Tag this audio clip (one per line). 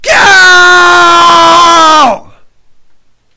cry
grief
man
pain
scream
shout
weep
yell